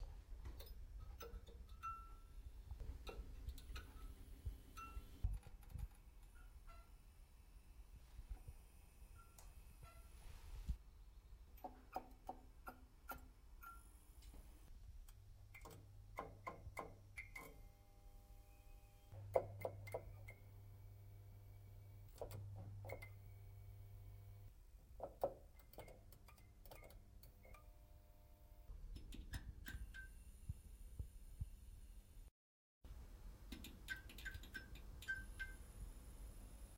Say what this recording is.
Tl light startup
A Couple of sound samples put together in one file...i recorded some of our Tl-lights for a logo sequence i made en decided to put it up for you guys!
Enjoy!